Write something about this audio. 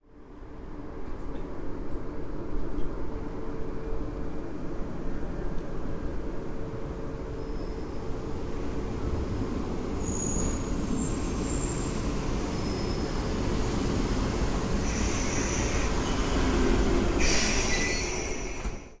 Train arrival
Raising sound of incoming train.
Arrival, CZ, Czech, Pansk, Panska, Train, Village